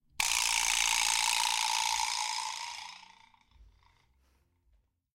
An amazing Vibraslap recorded by my good friend and engineer Mike B at FullWell in Phoenix, AZ. Have used this numerous times. Enjoy :)